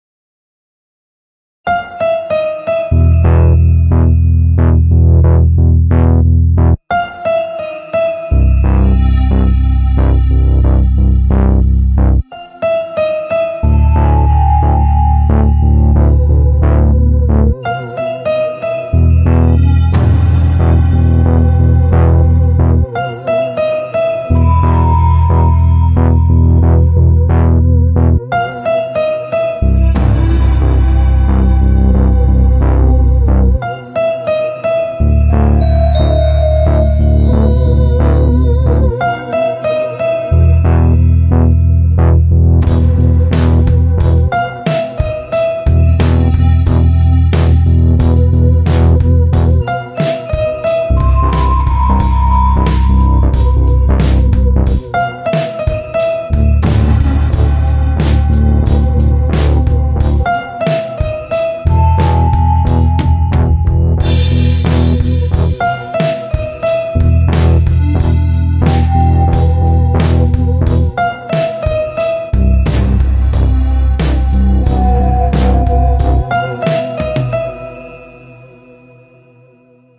Mysterious and sinister
Music I made in GarageBand for something called Victors Crypt. You can use it to whatever
I think it's suited for something exciting and spooky. Mysterious and maybe dramatic
exciting, intro, Gothic, terrifying, terror, creepy, intense, hell, chilly, chased, dramatic, crime, phantom, drama, thrill, haunted, ghost, background-sound, mysterious, thriller, horrific, fear, scary, nightmare, spooky, bogey, suspense, horror, sinister